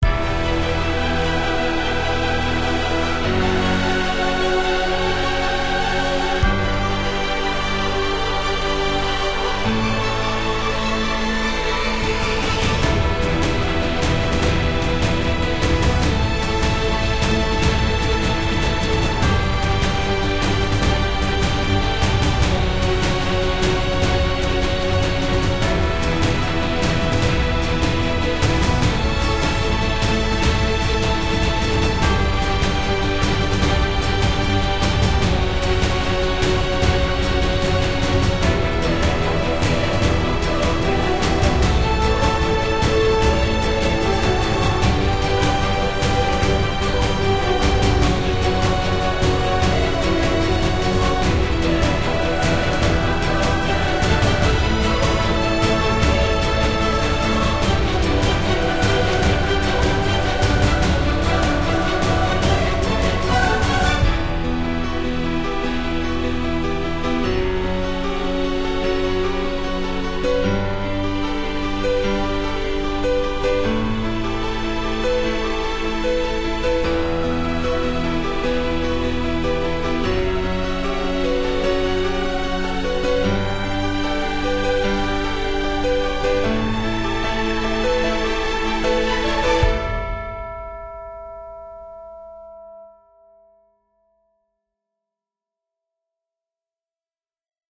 Epic Trailer Background Music
Genre: Epic Orchestra, Trailer
Well, my ears got blown because of this but it worth the risk.
trailer, orchestra, epic, background, music